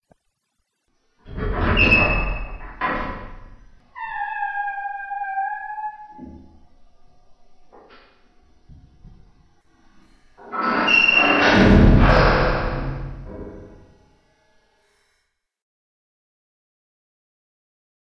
Dungeon gates
Some cool 'Prison gate' door sound I made from nawarwohl02's creaky old door sample.